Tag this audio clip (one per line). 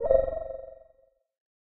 audio
beat
effext
fx
game
jungle
pc
sfx
sound
vicces